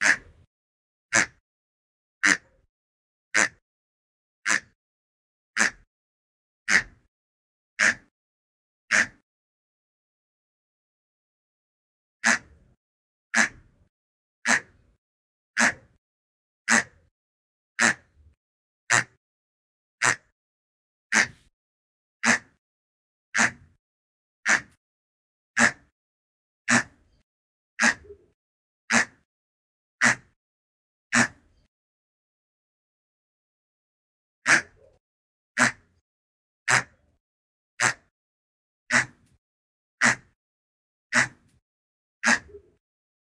Toy Pig MM108
A cute toy pig recorded with a Canon SX 510HS in the most non professional environment possible.
I used a De-reverb, a De-noise toosl and some EQ.
animal, cute, funny, pig, piggy, toy